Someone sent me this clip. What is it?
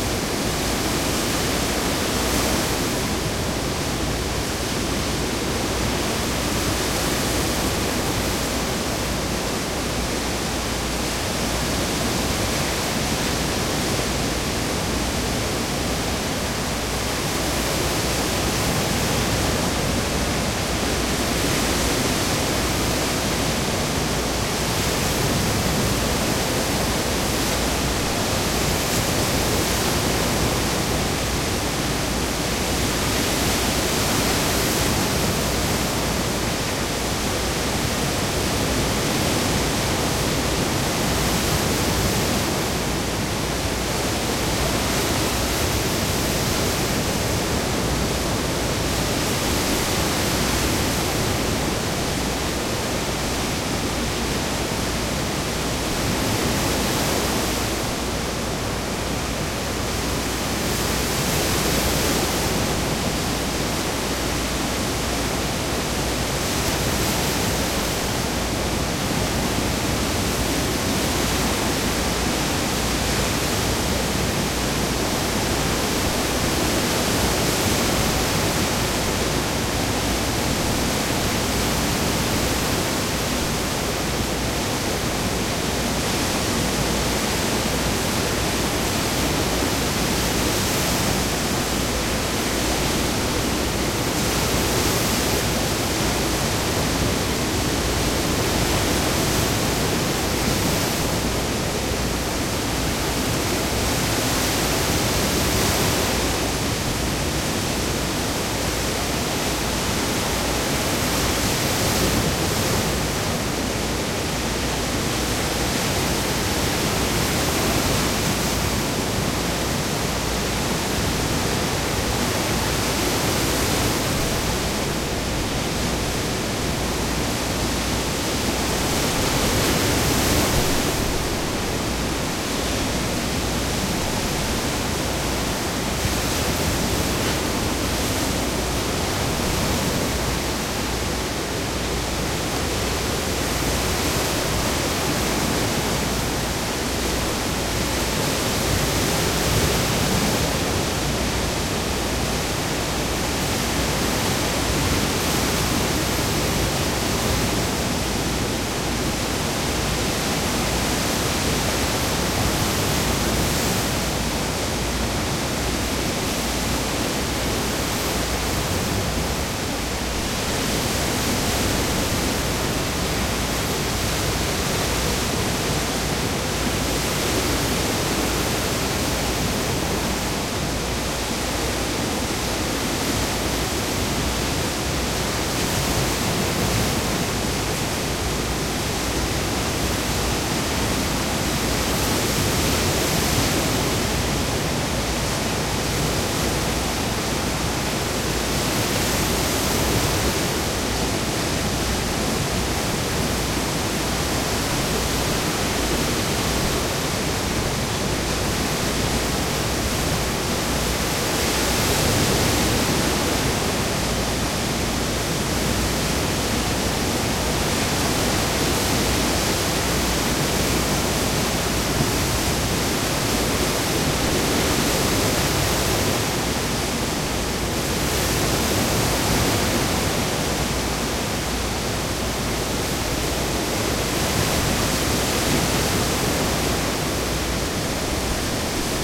waves beach med3 from balcony
waves beach medium intensity from balcony quite a ways away but direct sound